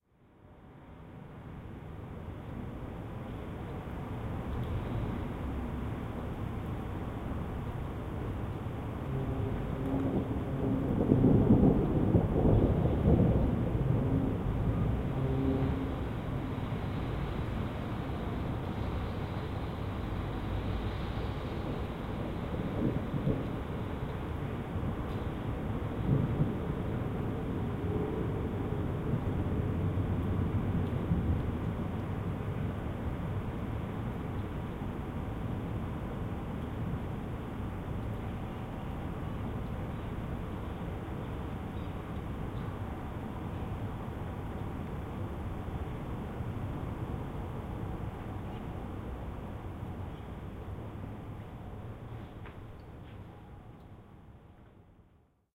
Quiet rumbling of thunder in Blackheath London. Recorded in stereo with an Edirol R1 using the internal mic.